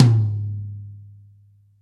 Yamaha Oak Custom Tom Mid
Hard stick hit on Yamaha Oak Custom drum kit tom
cymbal, drum, ludwig, paiste, pearl, percussion, sabian, yamaha, zildjian